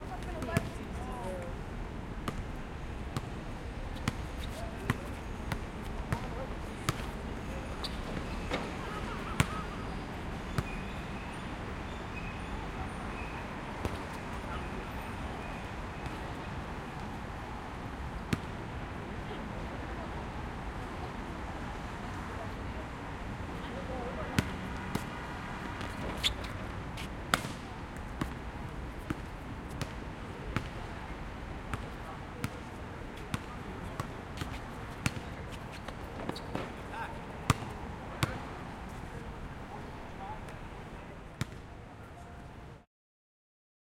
005-NYC outdoor basketball court, ambience
Basketball, Sport, Foley, New-York, Outdoor, Court